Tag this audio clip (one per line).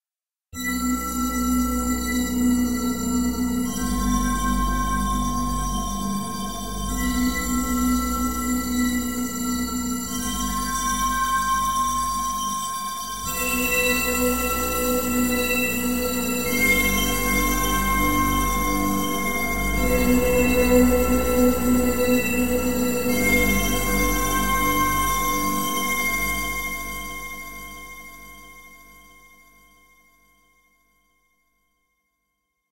ambient
background
deep
effect
emergency
futuristic
fx
hover
impulsion
pad
Room
sci-fi
sound-design
space
spaceship
starship